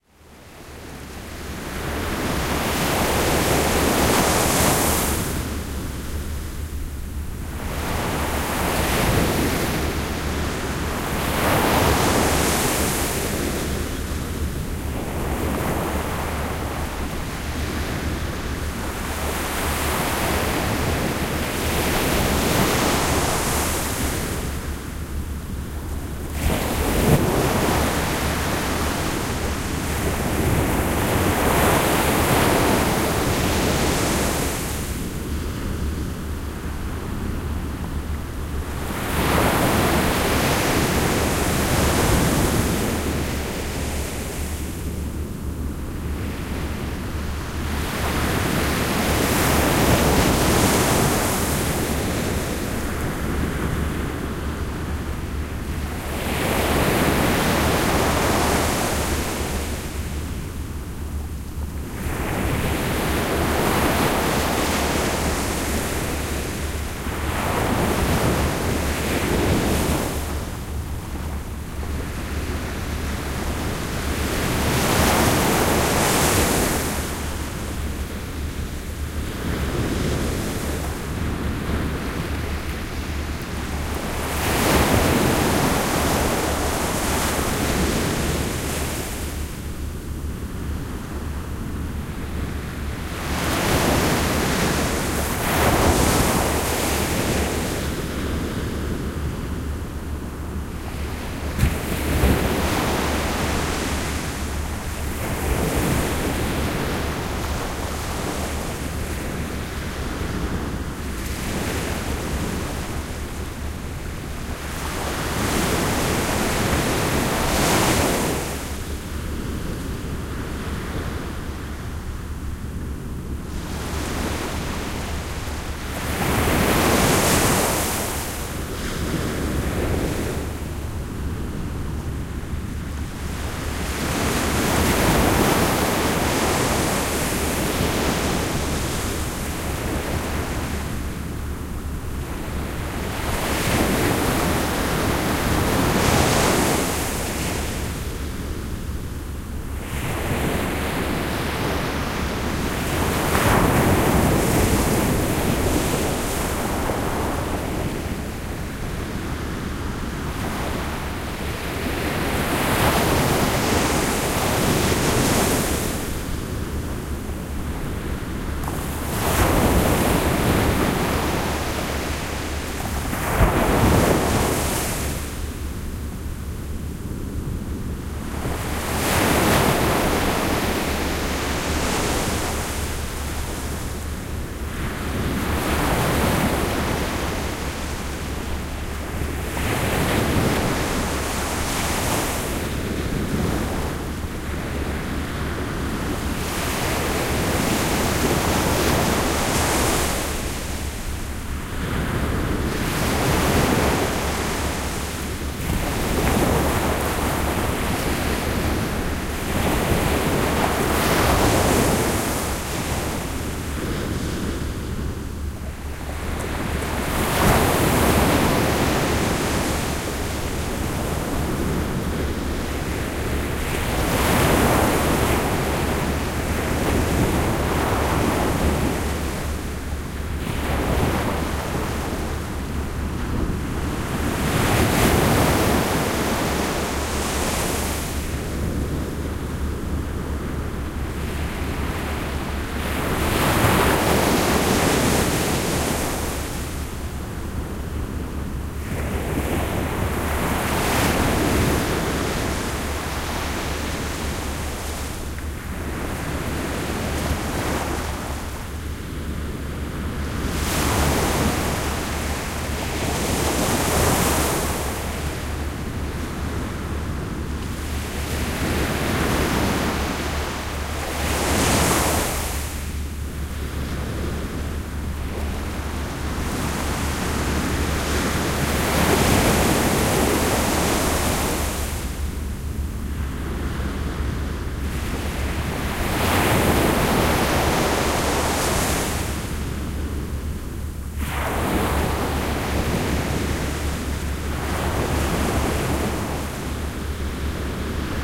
A fine stereo recording -in my opinion, of the North Sea in Denmark on a warm summerday last year. Nothing else just waves. Recorded with my SP-BMC-12 Sound Professionals Binaural Microphones and a minidisc. Very nice with headphones -good for relaxing and calming the mind :-)
test, water